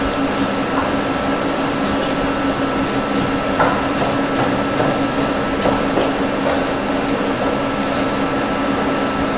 sample file recorded at work in venice